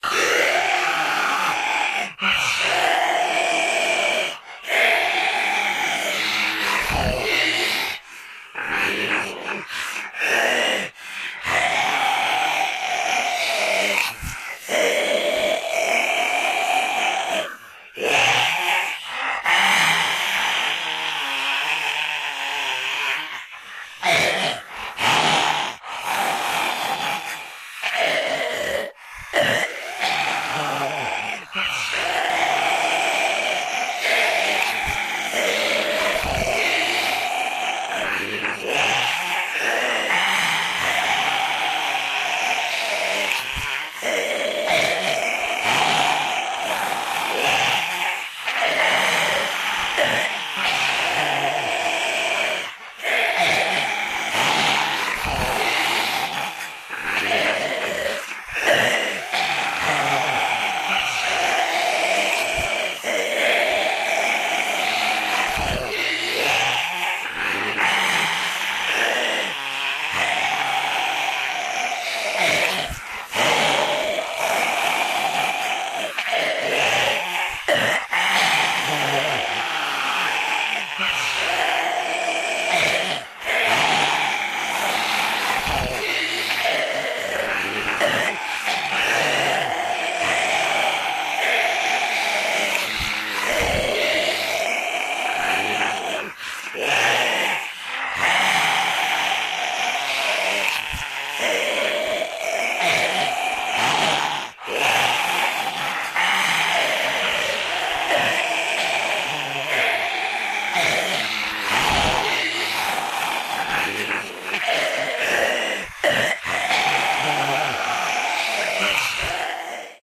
Zombie group 2 (small)
A smaller group of 2 zombies
disease, horror, sound, vocal, zombie